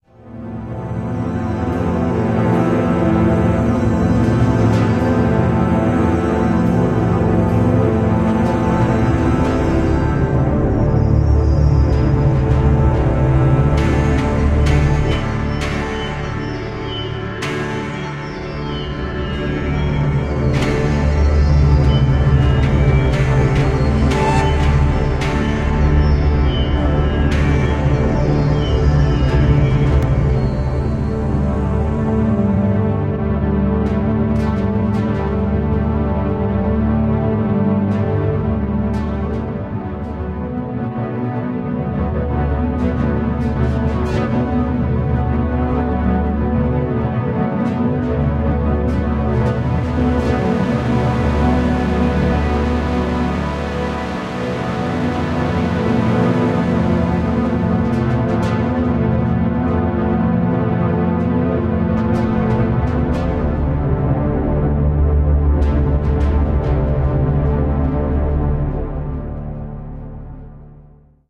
Swelling Deep Bass and Pads
A combination of three different pads with a deep, reverbating sound.